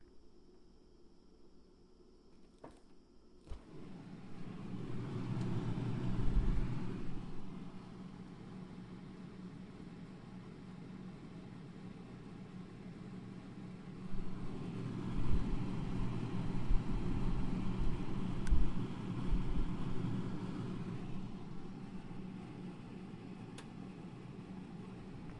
between fridge wall
tascam recording from a small space between my fridge and my wall
fridge, mechanical, refrigerator